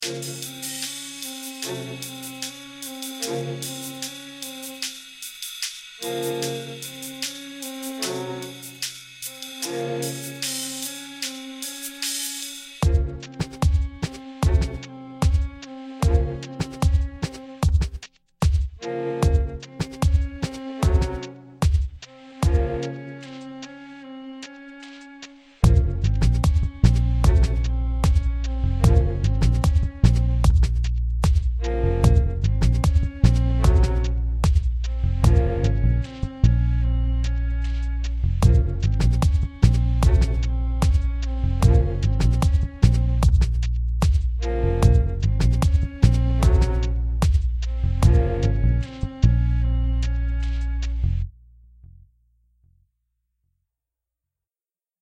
I composed this in Garage Band for a NYC theater productiion.